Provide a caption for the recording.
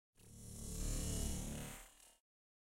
Transformes type of sound.